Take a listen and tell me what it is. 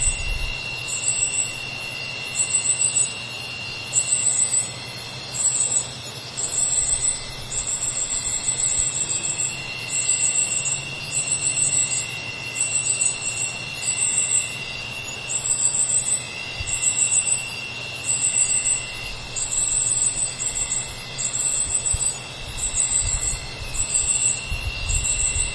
Costa Rica 3 Insects
ambiance, central-america, costa-rica, field-recording, insects, nature, night-time, nighttime, summer